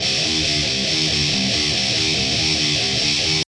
THESE LOOPS ARE ALSO 140 BPM BUT THERE ON A MORE OF A SHUFFLE TYPE DOUBLE BASS TYPE BEAT OR WHAT EVER YOU DECIDE THERE IS TWO LOOP 1 A'S THATS BECAUSE I RECORDED TWO FOR THE EFFECT. YOU MAY NEED TO SHAVE THE QUIET PARTS AT THE BEGINNING AND END TO FIT THE LOOP FOR CONSTANT PLAY AND I FIXED THE BEAT AT 140 PRIME BPM HAVE FUN PEACE THE REV.